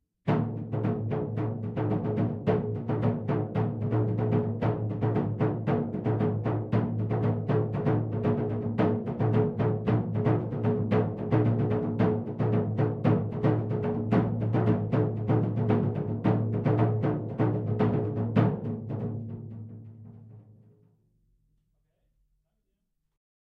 Davul Room S Percussion Bass Drum
Just a little recording of my davul from david roman drums. high tones
drum, davul, rhythm, turkish, bass